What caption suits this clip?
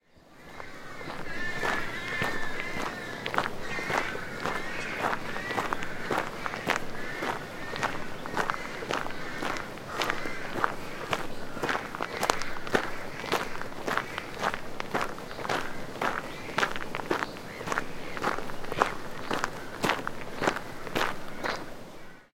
Woodland path walk in Spring with nesting rooks
A walk through woodland in Gloucestershire, UK in Spring with rooks nesting above.